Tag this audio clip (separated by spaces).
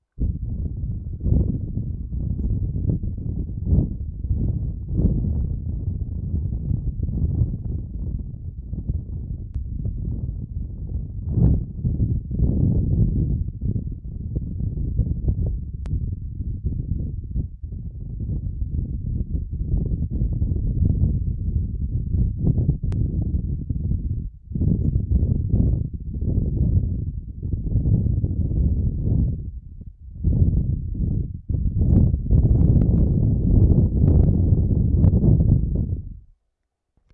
bike bicycle wind blow ride